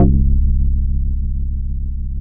House Organ C#0
A multisampled house organ created on a shruthi 1 4pm edition. Use for whatever you want! I can't put loop points in the files, so that's up to you unfortunatel
Vibes, House, Multisampled, Organ, Happy-Hardcore